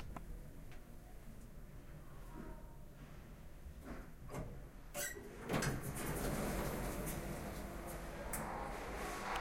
puerta ascensor
You can hear the sound of an elevator while is opening its doors.
door, opening, elevator, campus-upf, UPF-CS14